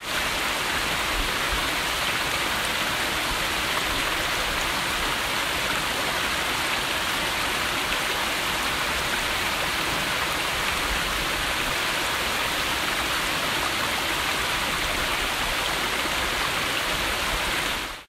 Rivulet flows in the mountains
A little rivulet runs down a rocky path in the mountains. Recorded with an iPhone 7.
field-recording, Flow, River, Rivulet, Stream, Water